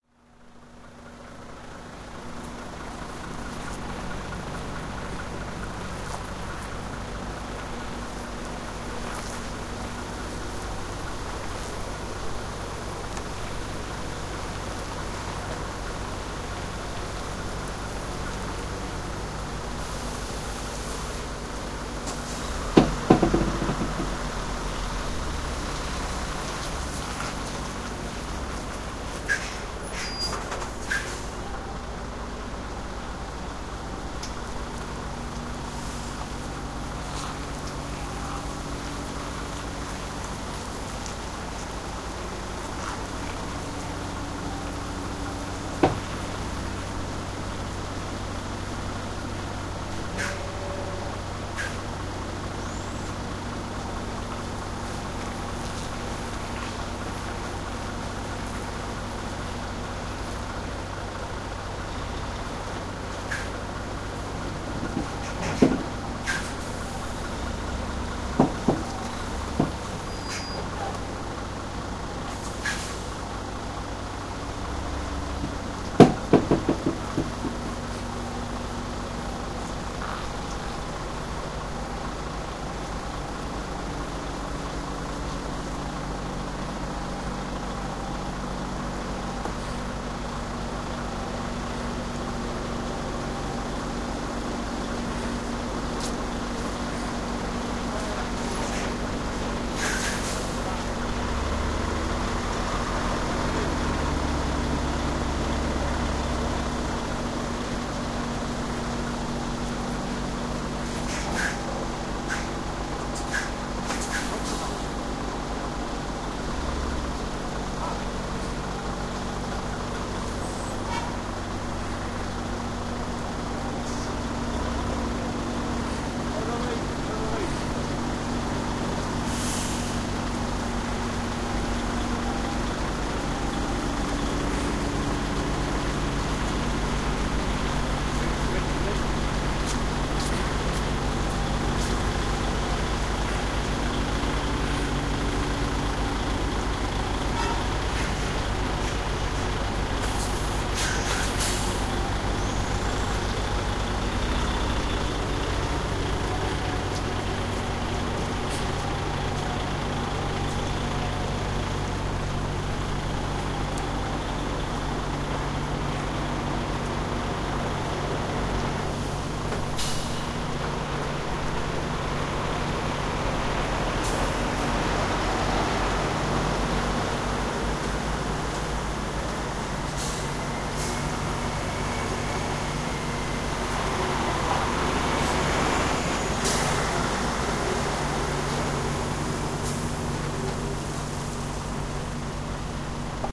19092014 świebodzin parking tir
Fieldrecording made during field pilot reseach (Moving modernization
project conducted in the Department of Ethnology and Cultural
Anthropology at Adam Mickiewicz University in Poznan by Agata Stanisz and Waldemar Kuligowski). Sound of parking truck in Świebodzin parking site (on the crossroads DK92 and S3).
engine, fieldrecording, lubusz, noise, parking, poland, swiebodzin, tir, truck